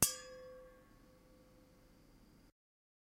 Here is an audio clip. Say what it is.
Megabottle - 24 - Audio - Audio 24
Various hits of a stainless steel drinking bottle half filled with water, some clumsier than others.
ring, steel, hit, bottle, ting